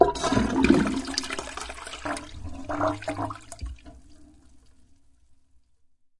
This is a toilet flush in water saving mode, recorded in London, England, in April 2009. I used a Zoom h4 and a set of Cad M179 studio condensers
glug, gurgle, toilet